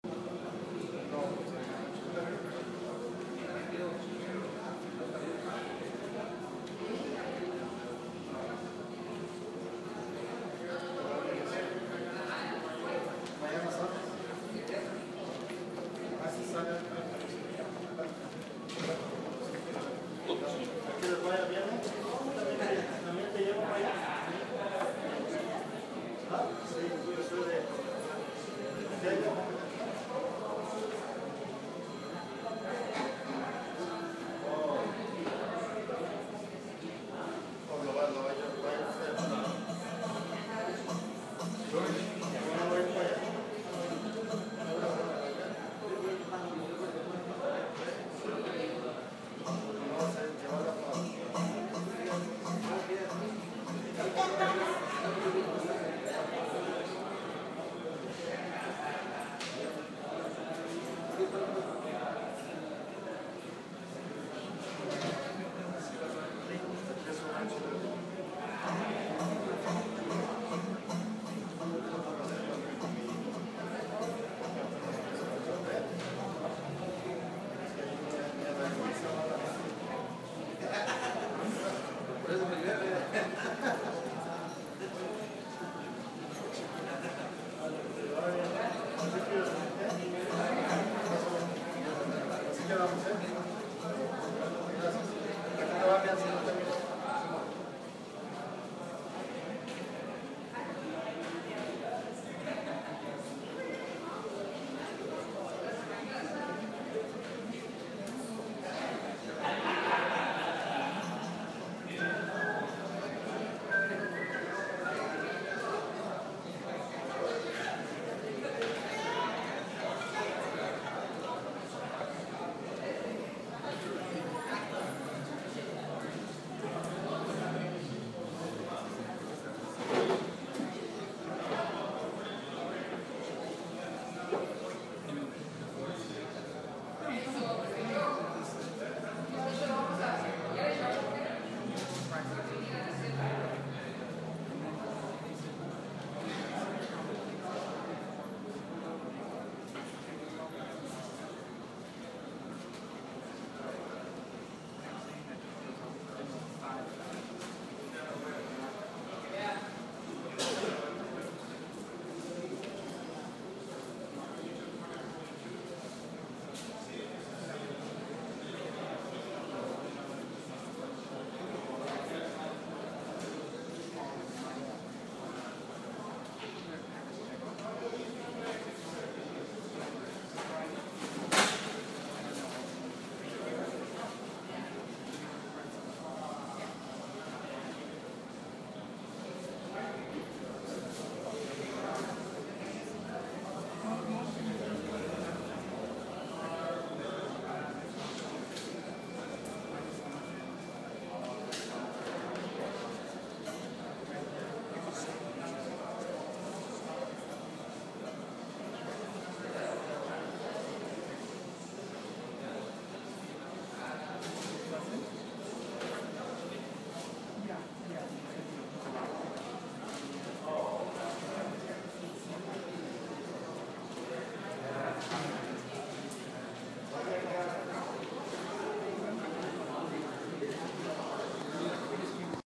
Car Dealership Waiting Room Ambience
Recorded at a Toyota dealership service waiting area on an Iphone 6 (wasn't planning on recording anything). It can be used for a lot of different scenario, two or three phone rings, there's a bunch of people talking (Lots of Spanish but you can barely comprehend what anyone is saying).
Ambience, background, Indoors, spanish, talking